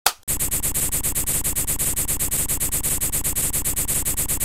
A sound effect for a machine. Created using a party popper and a bicycle pump. Recorded using Audacity and a Stereo 'YOGA' (EM-268) microphone.
machine, pop